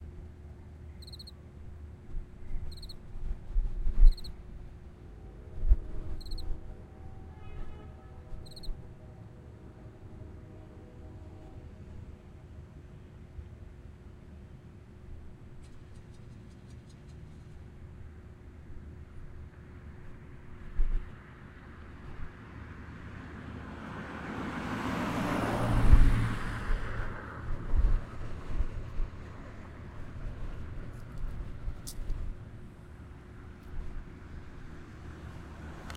I heard a cricket in the bush and decided that that sound might be interesting in something... Recorded at Ball State by a zoom recorder (by AJH)
bsu, bug, kricket, nature, traffic, university